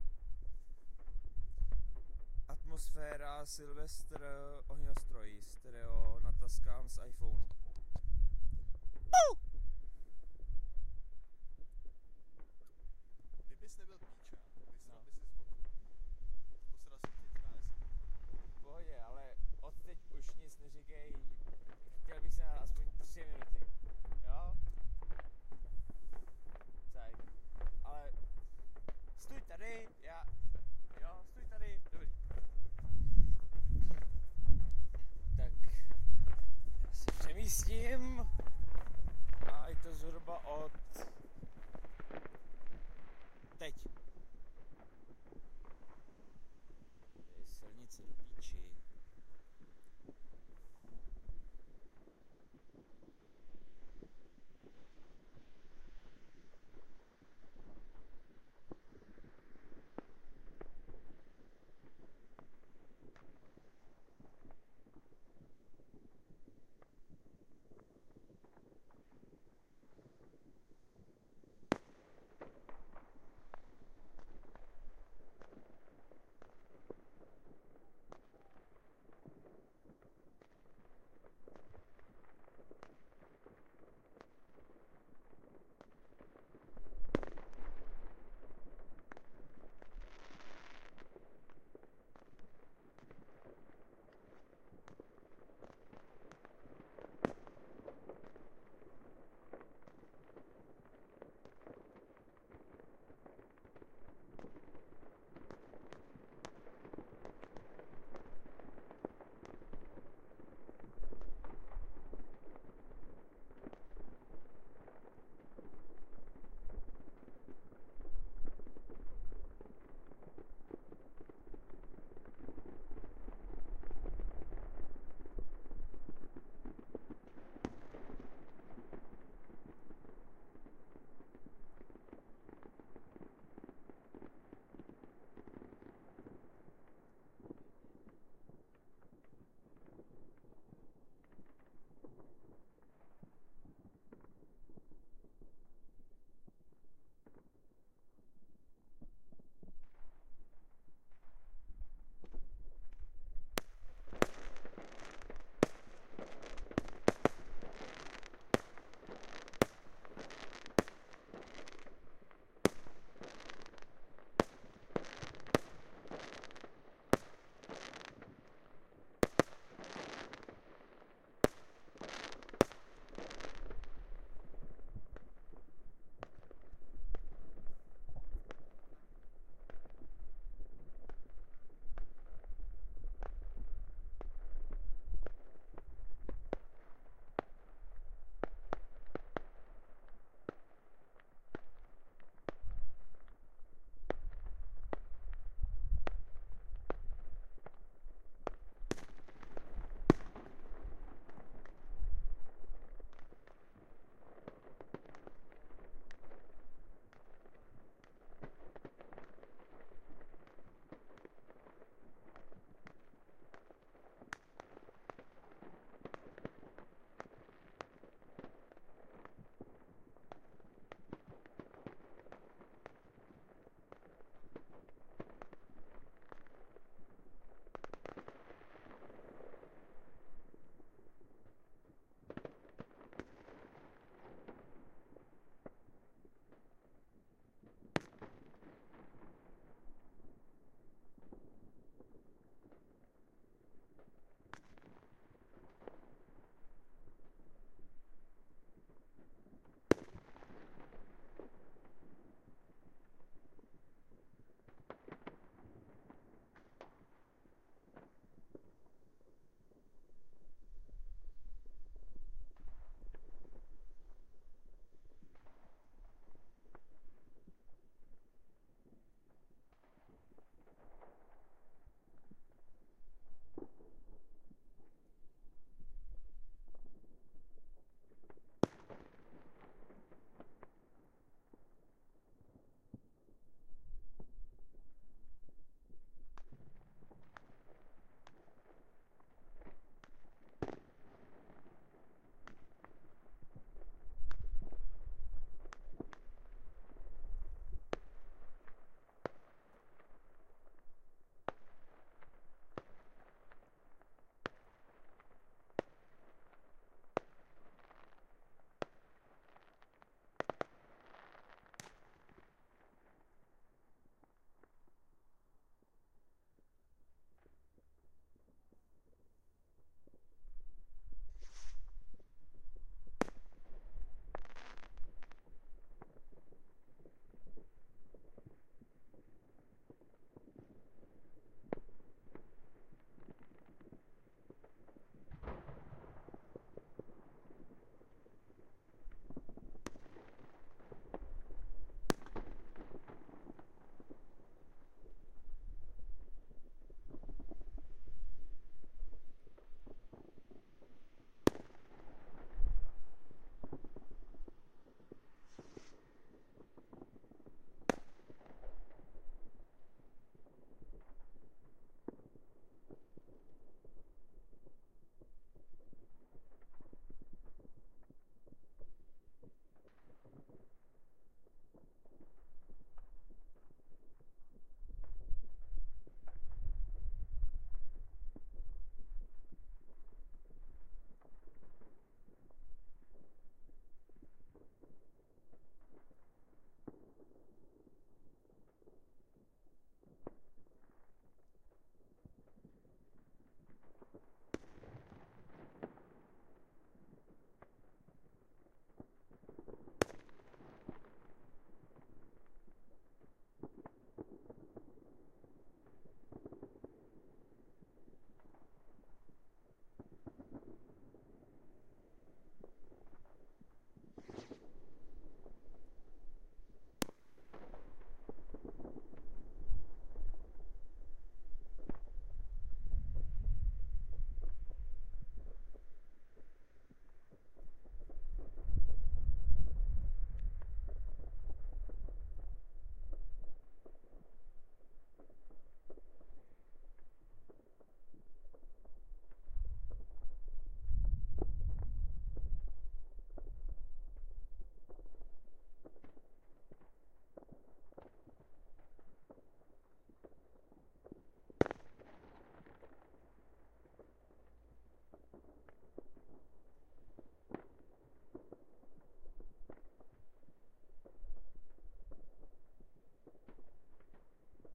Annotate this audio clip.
New Years firework (from distance) in Prague , Czech Rep. recorded with Tascam iM2 (iPhone).